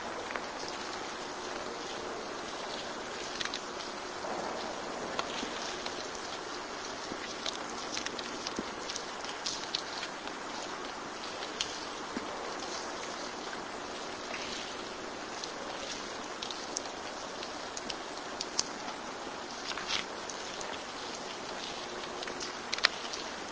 It's a rain recording. Loud rain drips in a parking lot.
rain, drip, drop, water